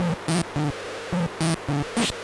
Elek Perc Loop 003 Var11
Unpreocessed rhythmic loop from my Mute-Synth-2. Simply cut and trim in Audacity after recording straight into the laptop mic in put.